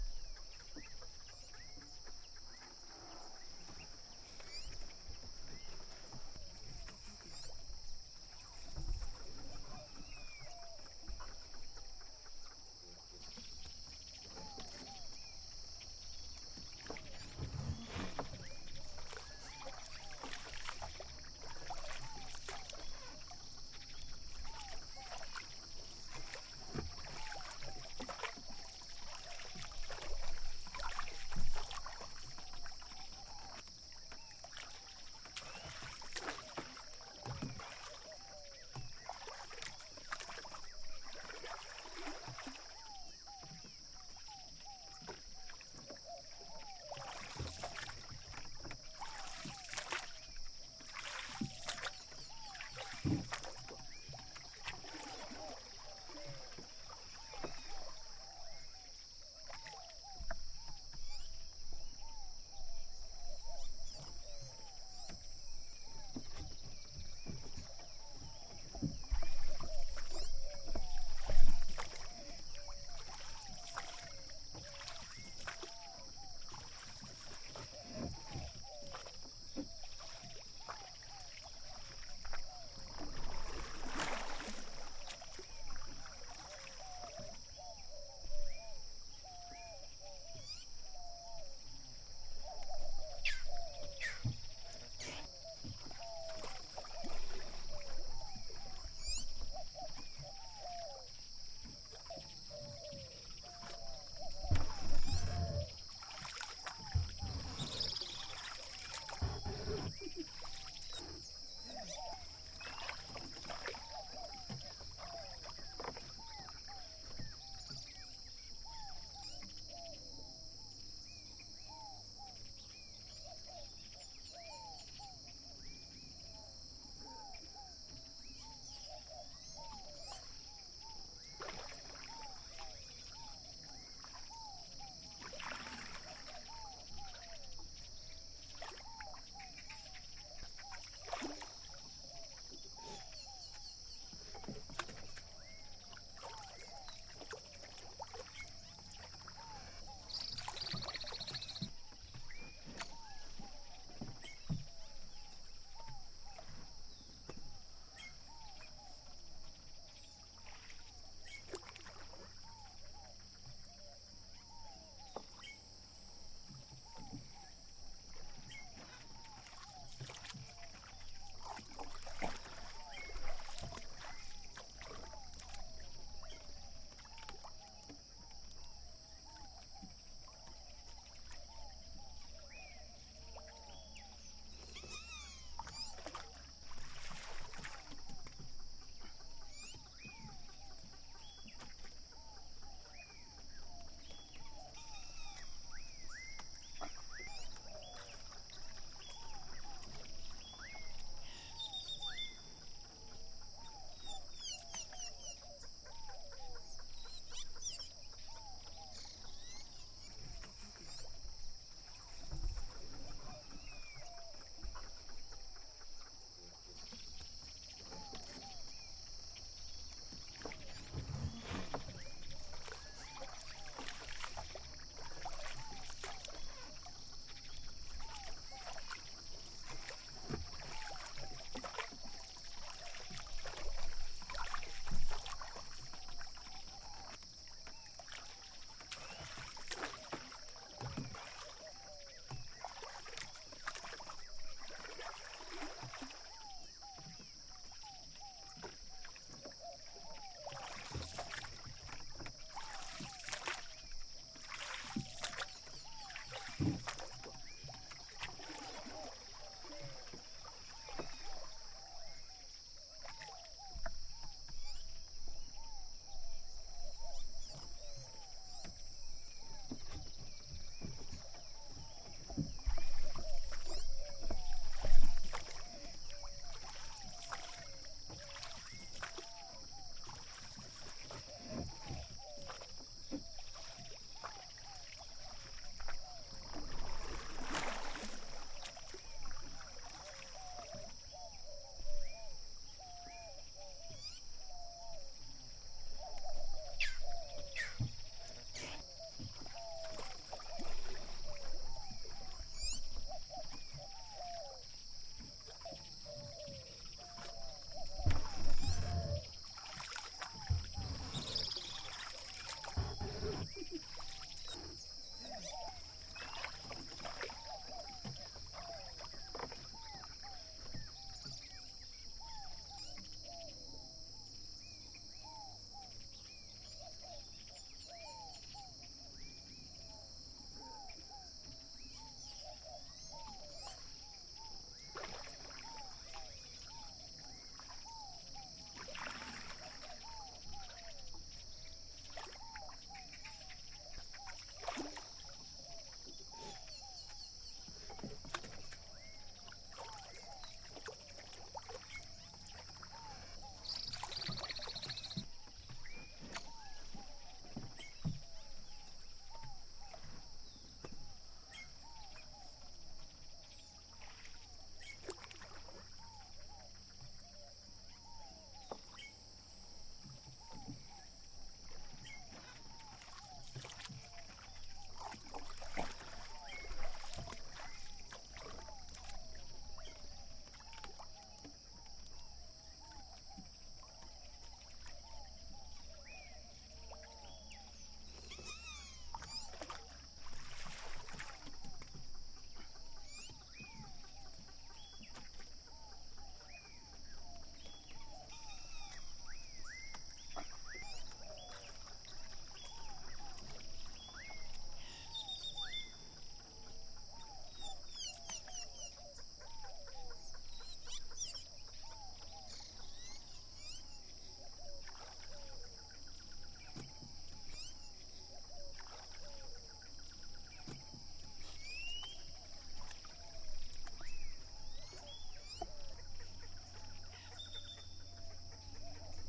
ambience lake morning water birds crickets
Field-recording of an ambience sound of a rural village in Nicaragua. You can hear the morning sounds of crickets, birds, the Managua Lake waves, and other sounds.
bird, cricket, lake-wave, morning, small-village